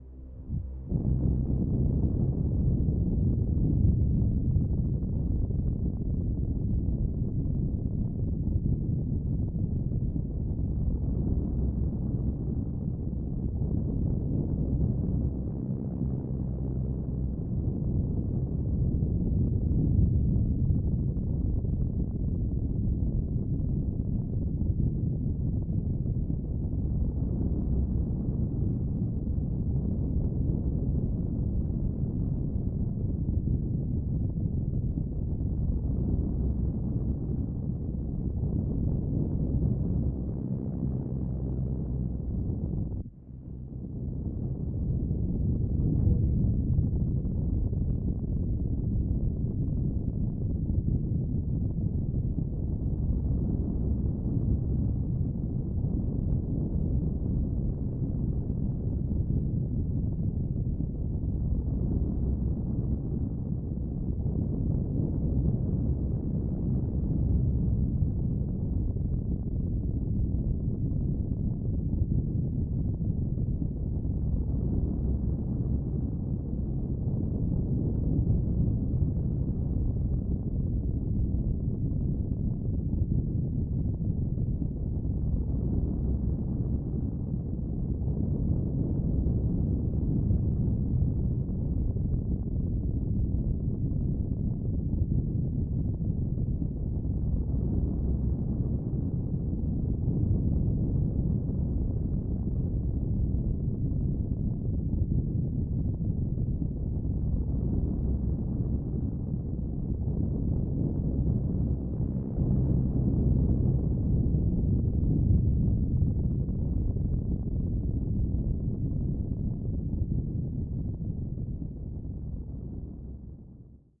This sound was made by me blowing into the microphone for a 30 seconds. the when I edited it I cut out all the parts in the track where I took another breath of air. And finally I added a low Pass EQ filter, so that I could create that thunder sound. Or it can even be a sand storm ambiance within a dessert terrain.